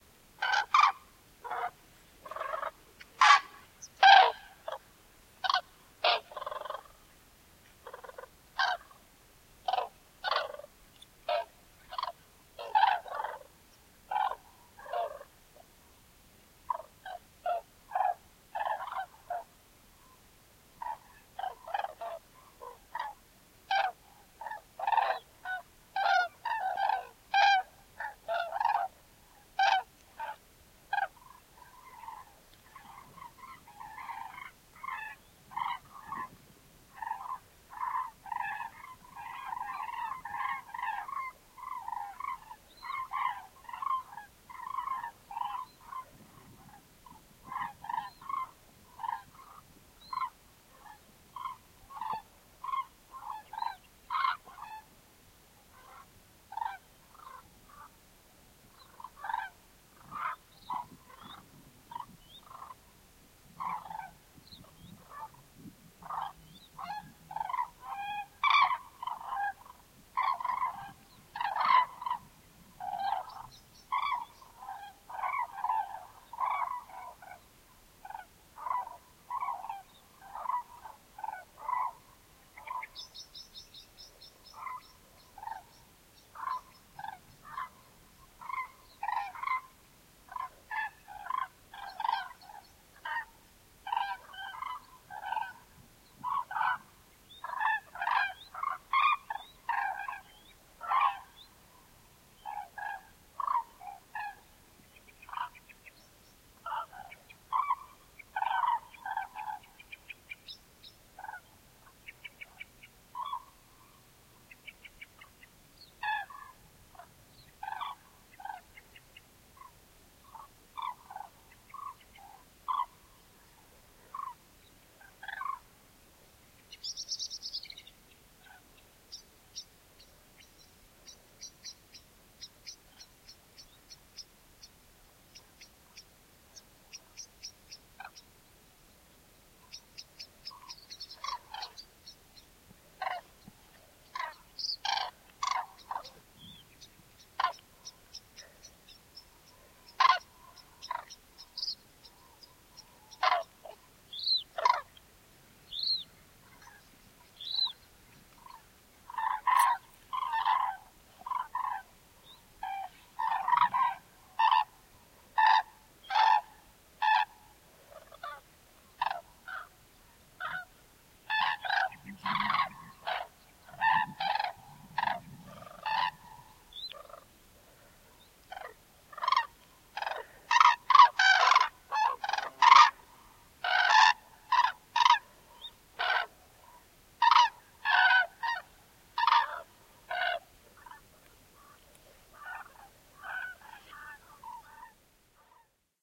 Grullas :: Cranes
El paso de bandadas de grullas en el río Tiétar al comienzo del invierno, cerca de Buenaventura.
The pass of flocks of cranes on the Tietar River early winter, near Buenaventura.
Grabado/recorded 15/12/2014
animales, animals, aves, birds, Buenaventura, common-crane, grulla-comun, naturaleza, nature